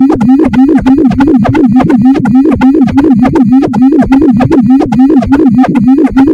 261.6 Hz.10sec. Indeed phaser10.origina / 217 modified frequency LFO starting phase 3.4 150 depth 28 return (%) 50. 1.7 wah effect frequency phase starting 360 LFO depth (%) 150 28 Resonance frequency wah (%). reverse. normalize
vibrance, techno, waves